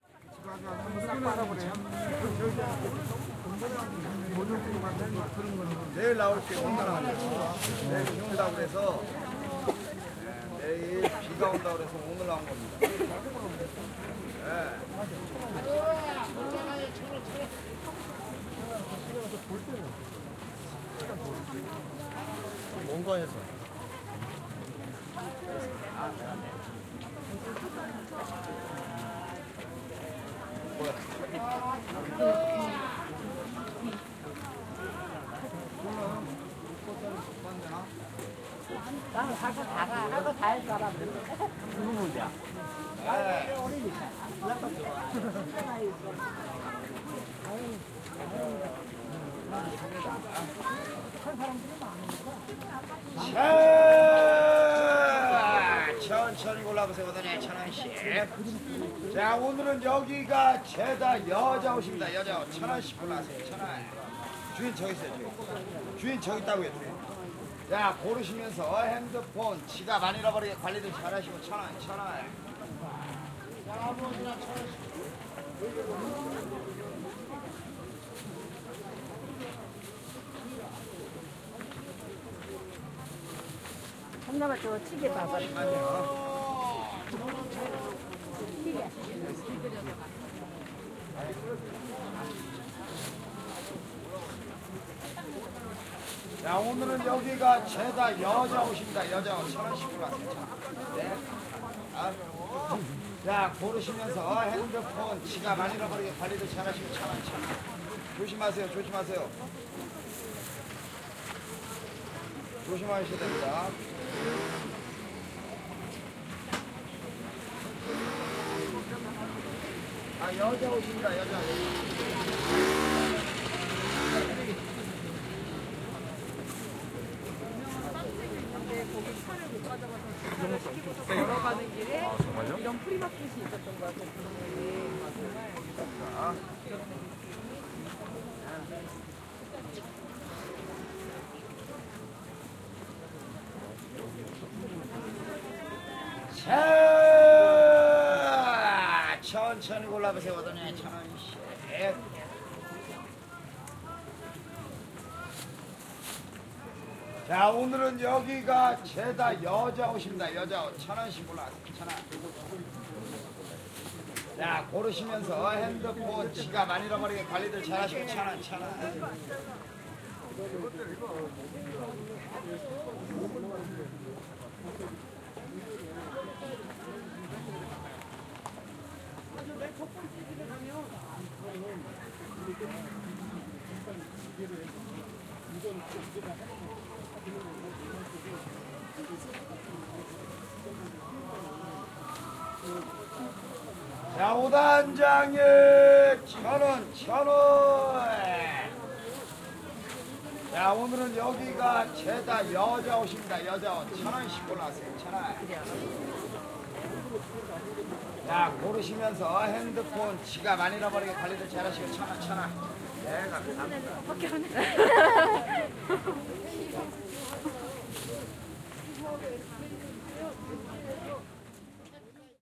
korea flohmarkt
Stralling through the Fleamarket in Seoul.
Field-recording, people, shout, market, binaural-recording, town, city